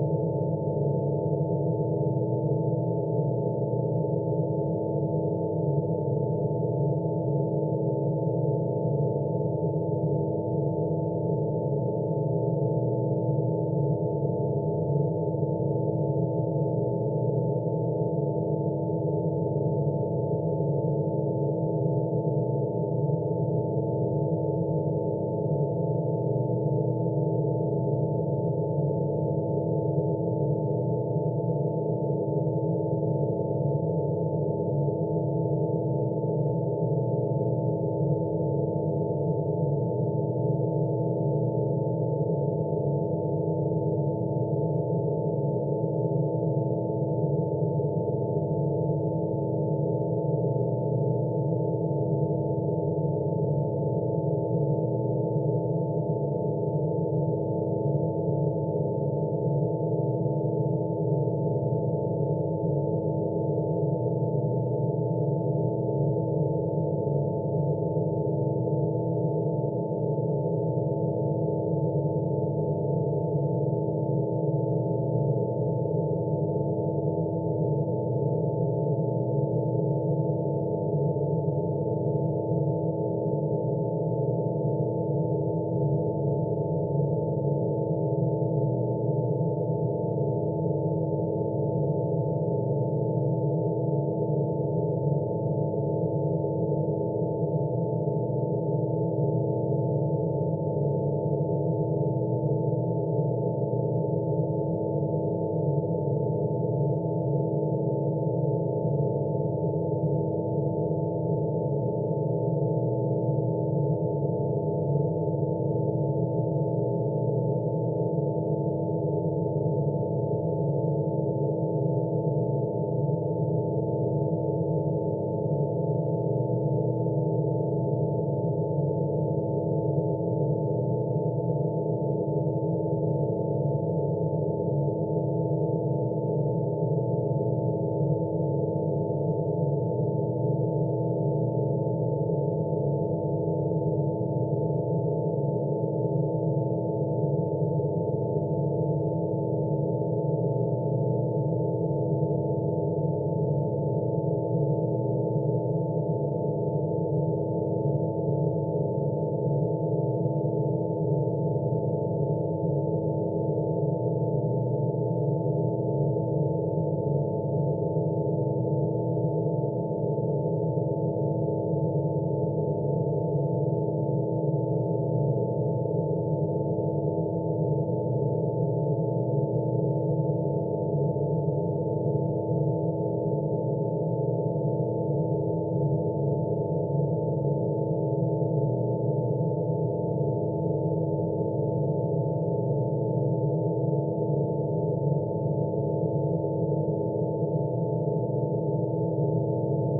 BeeOne Loop 20130528-142832
Mad Loop made with our BeeOne software.
For Attributon use: "made with HSE BeeOne"
Request more specific loops (PM or e-mail)